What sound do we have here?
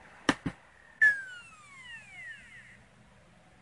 CLICK SONIC END
Click from a cd reader? Heh?
CD, Click, Epic, Macbook, Machine, Mecahnical, Plastic, Player, RAW, Sonic, Spin